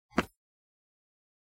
player jump
Jumping on stone sound effect recorded with a Zoom Recorder